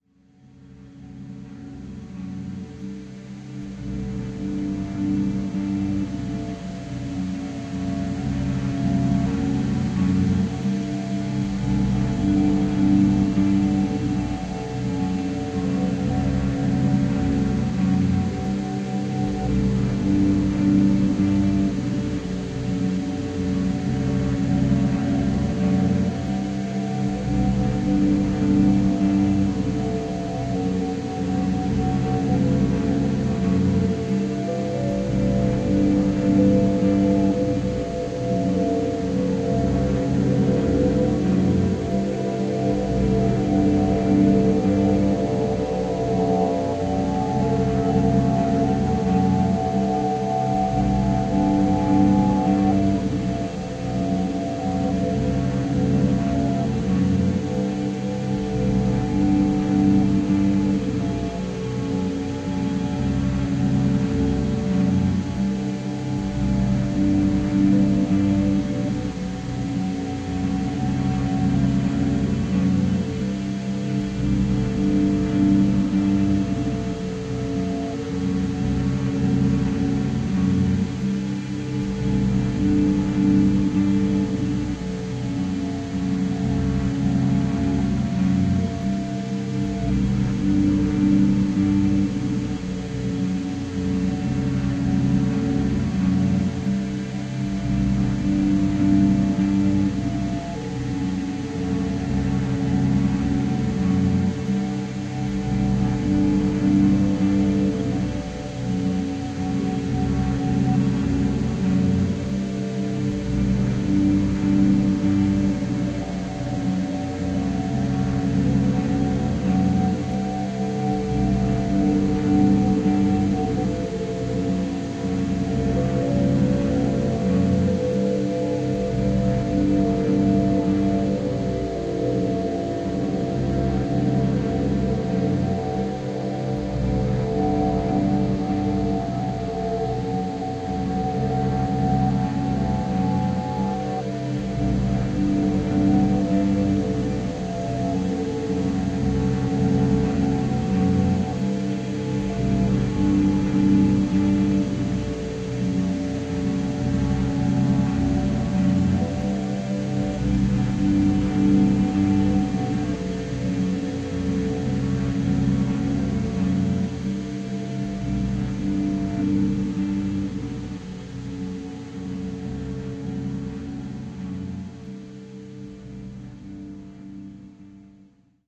Looking out over the city, clouds looming.
ambient, lofi, glitch, drone, dreamy